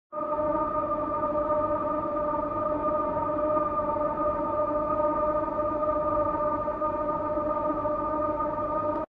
Draft of air